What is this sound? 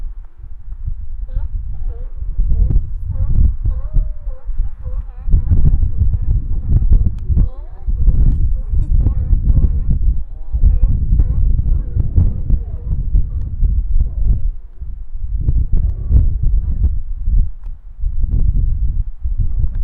Various ocean sounds recorded in and around Seaside, WA